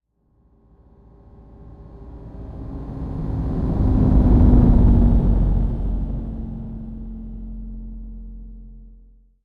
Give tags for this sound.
fly-by
flyby
low
pass
pass-by
passby
passing
rumble
sci-fi
scifi
ship
space
spaceship
ufo
vehicle
whoosh
woosh

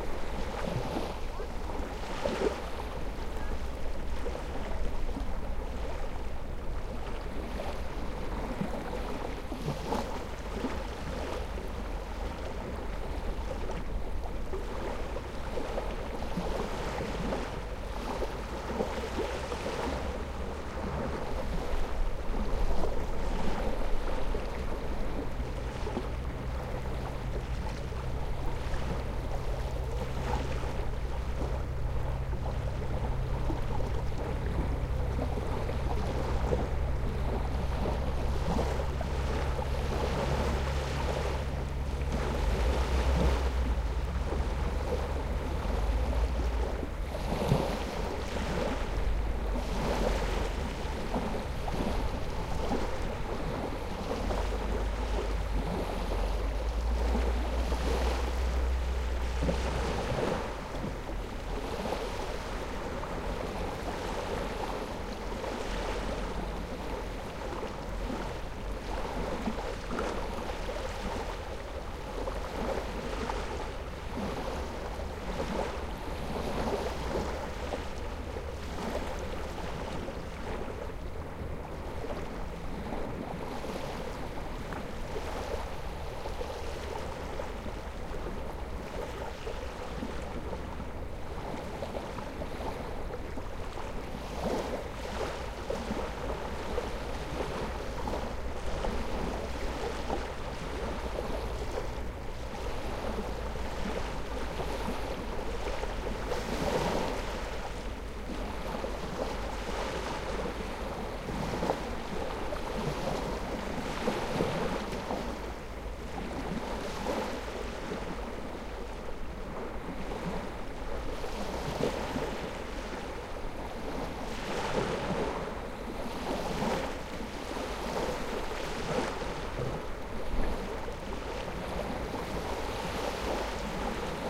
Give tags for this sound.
denmark
westcoast
nature
Waves
relaxing
field-recording
spring
ambient
easter
water
beach
relax

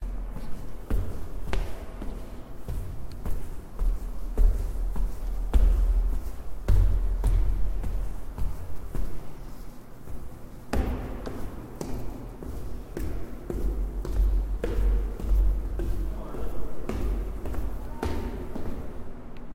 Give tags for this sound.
Echo
Footsteps
Hallway
Tile
Walking

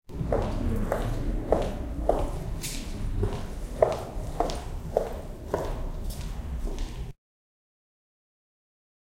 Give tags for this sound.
cough
footsteps
human
walking

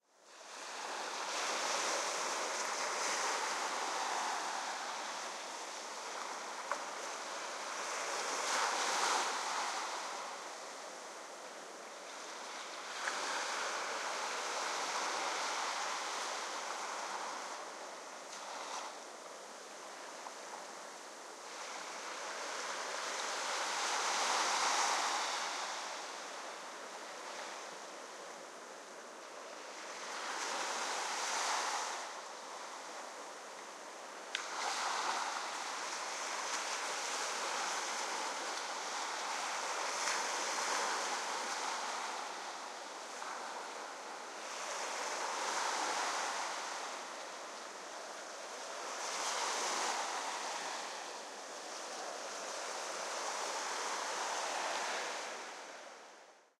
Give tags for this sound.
netherlands
northsea
sandy
scheveningen
sea
shore
water
wave
waves
wind

